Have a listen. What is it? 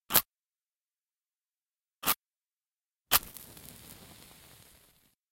Foley Matches Layered
striking a match
fire, foley, match, striking